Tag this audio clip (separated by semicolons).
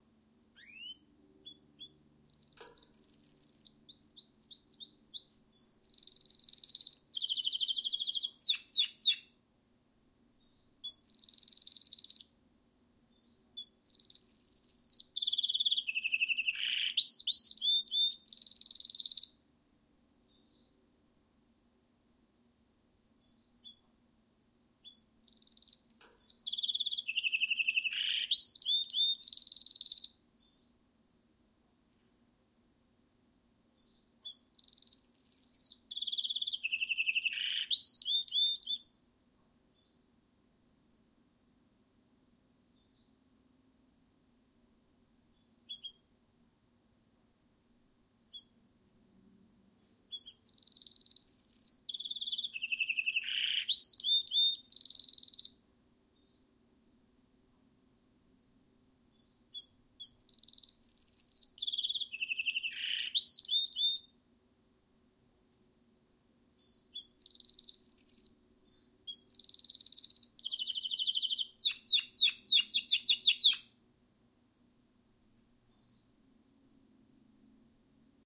canaries; singing; fp24; shure; near; me67; me66; tweet; coincident; birds; hdp1